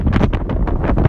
wind windy storm
storm, wind